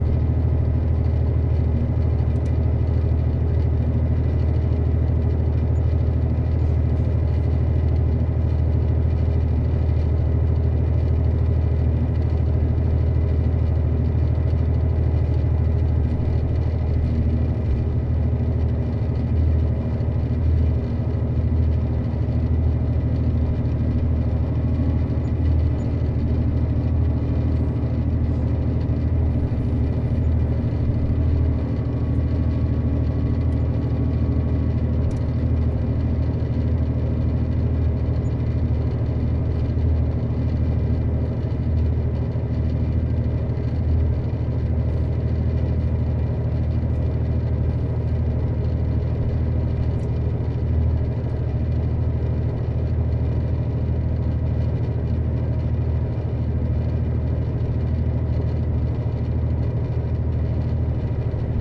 bus engine near heating
Sound of bus engine recorded near heating panel. So it picked some of the vibrations of the pannel.